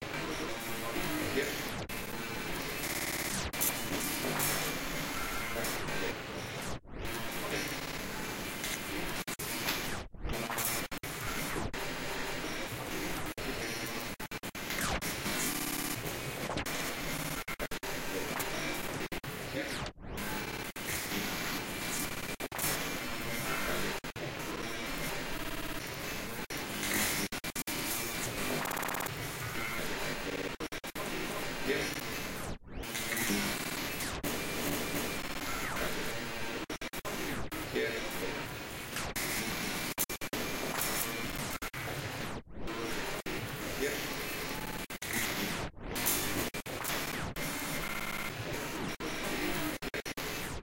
tb field tokyo
One in of a set of ambient noises created with the Tweakbench Field VST plugin and the Illformed Glitch VST plugin. Loopable and suitable for background treatments.
Ambient, Field, Glitch, Illformed, Noise, Tweakbench, VST